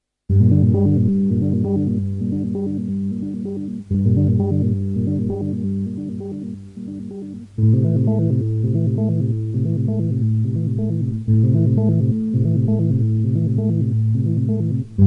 filtered arpeggio edit
An effect I found on my Zoom bass effects system while playing notes on
the bass guitar, which was taken straight into my 4-track tape machine.
Almost like a Roland Juno style arpeggio.
arpeggio, bass, effects